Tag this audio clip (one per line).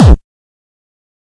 drumloop
synth
bass
drum